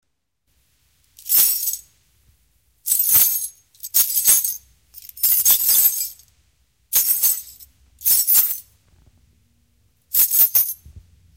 llaves cayendo
dejar caer llaves de la casa en baldoza
metal, llaves, caida